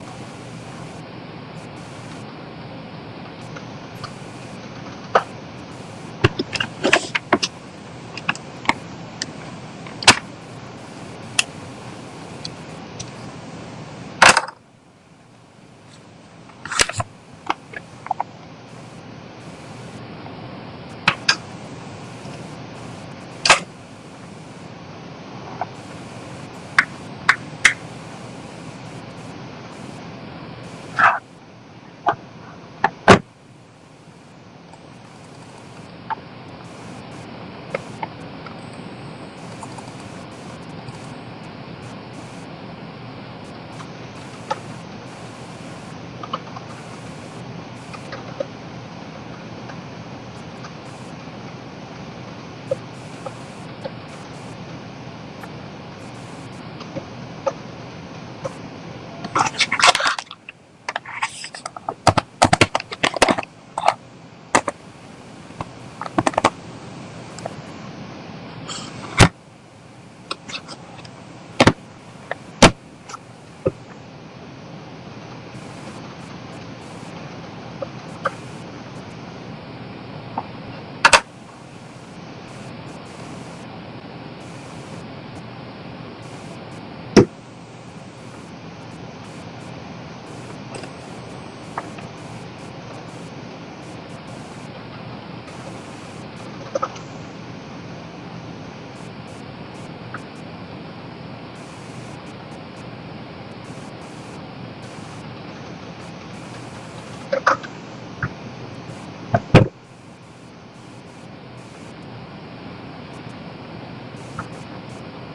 This is some skype noise. Typical noise you would expect to to hear during a skype call.